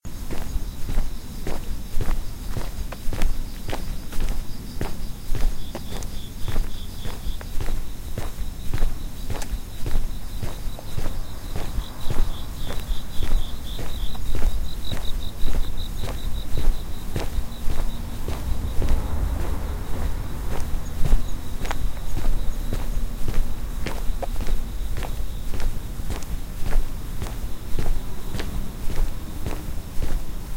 walking on the fall street
night, fall, cricket, insects, footstep, walk, footsteps, Japanese, field-recording, Japan, walking, steps